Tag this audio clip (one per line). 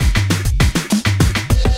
drums
hip-hop